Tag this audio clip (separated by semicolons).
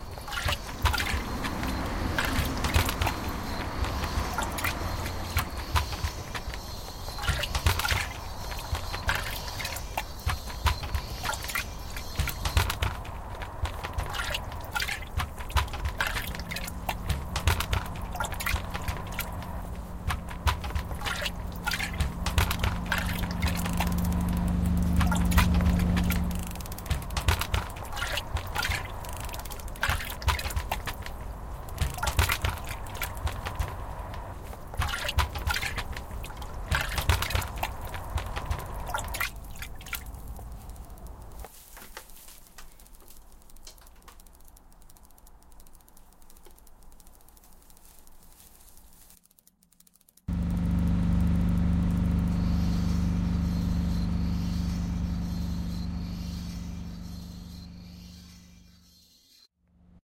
wispelberg; belgium